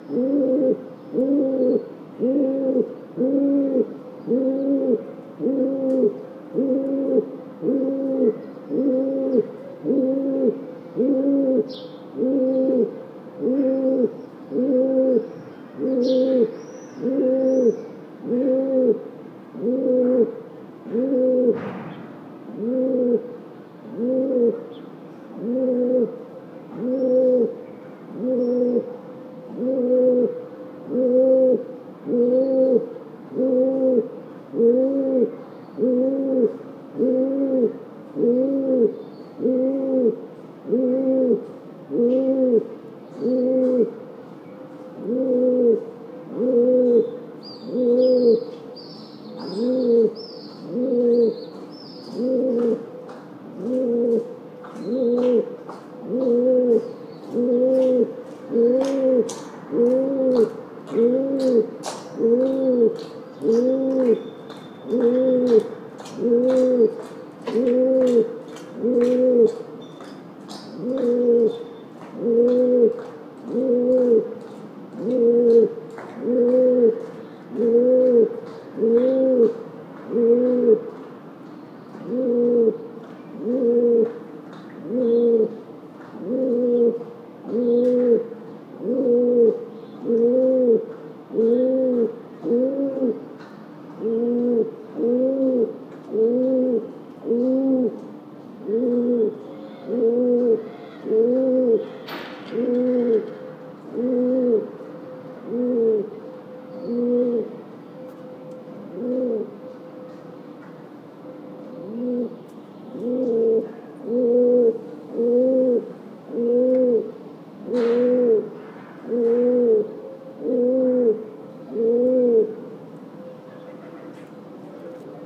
this cooing pigeon was recorded quite close, with Senn MKH60+MKH30 into FR2LE